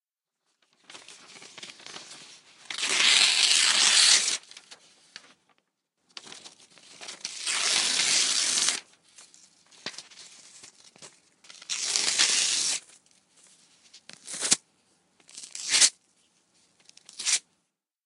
tearing paper2
Tearing up a piece of paper.
paper, ripping, tear, tearing, tear-paper